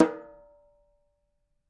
djembe longrim mute3
A Djembe drum sample library for your sequencing needs :)
drum, drums, djembe, percussion, african, ethnic, sample-library